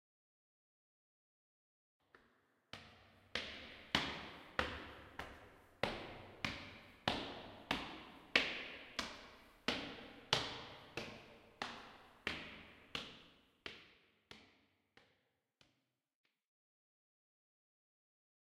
Walk - Stairs
Walking up the stairs
CZ, Czech, Panska